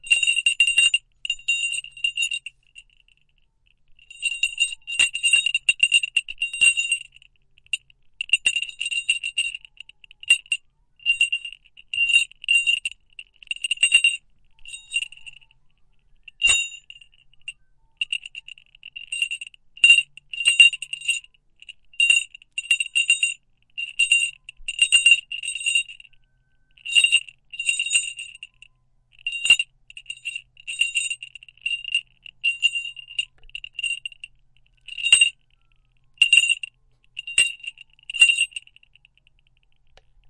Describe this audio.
A small Tibetan bell jingling. Recorded in a small practice room. Sound is dry, nice to add your own reverb to.
ringing, ring, Tibetan, high, pitched, bright, bell, jingle